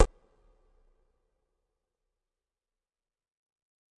batterie 1 - weird short electronic hihat 3
BATTERIE 01 PACK is a series of mainly soft drum sounds distilled from a home recording with my zoom H4 recorder. The description of the sounds is in the name. Created with Native Instruments Battery 3 within Cubase 5.
percussion, short, soft